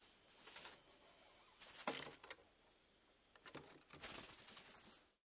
Riflebirds eat grapes placed on the table on which the microphones were sitting. Fly in and fly out. Audio Technica AT3032 stereo microphone pair - Sound Devices MixPre - Edirol R09HR digital recorder.